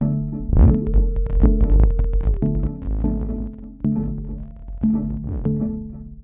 Making weird sounds on a modular synthesizer.
noise, synthesizer, analog, modular, electronic, synth, weird, synth-library